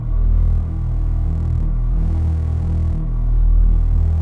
Granular drone
Sampled didge note (recorded with akg c1000s) processed in a custom granular engine in reaktor 4